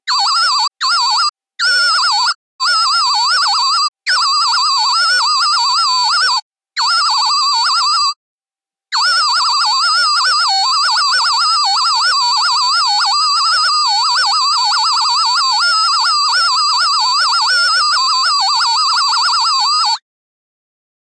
Creepy Ring Modulation (Hellraiser style)
Attempt to recreate the ringmod effect that sounds the first time the cenobites appear in the 1987 original Hellraiser movie. Recreated with a Roland JD-Xi + Protools + Roland Quad-Capture.
1987, Ring, effect, synth, Modulation, creepy, Hellraiser, Ring-Modulation, fx